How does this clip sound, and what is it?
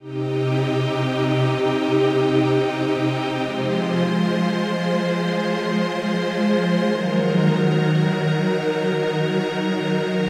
Mellow Burst
A short Trance Breakdown line - Produced and Created by myself featured in a track of my EP!! Raw copy!!
trance, breakdown, dance, electronic